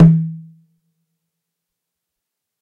This drum is an authentic Sangban carved from wood in Maui and fitted with cow hide skins then sampled on Roland SPDSX